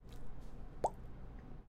4 Anzuelo Salpicando
Water, Splash, Fx